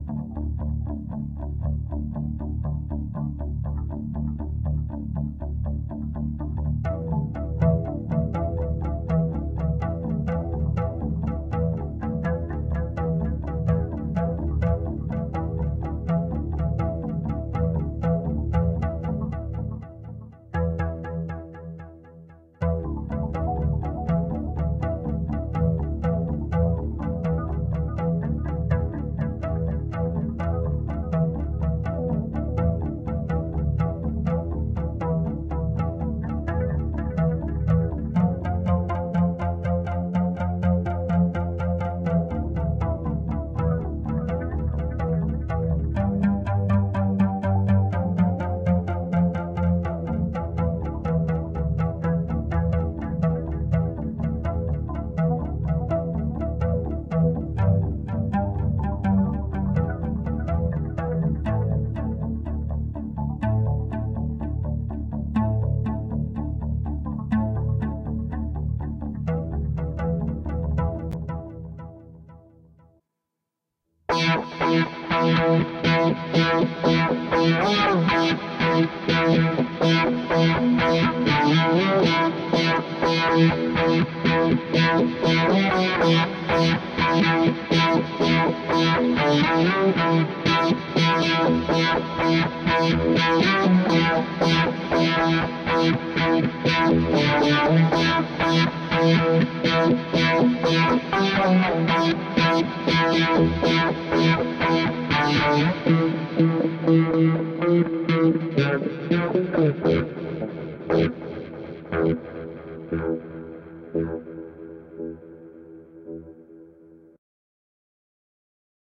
transmission sent yet confused
Space synth delays with guitar. The transmission was sent but it appears to be garbled. We might be receiving a response.
noise, effect, sound-effect, electronic, fx, guitar, wave, sound, space, electric, synth